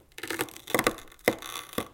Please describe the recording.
Scraping freezer ice with a knife